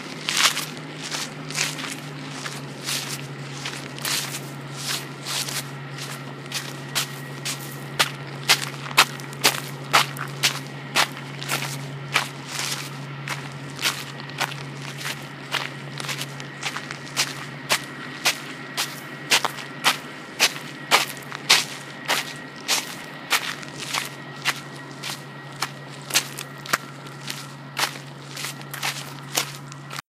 The sound of me spinning and stomping my sandals through some gravel.
Recorded in Winter Park, Colorado, United States of America, on Wednesday, July 17, 2013 by Austin Jackson on an iPod 5th generation using "Voice Memos."
For an isolated sample of the bus in the background, go to: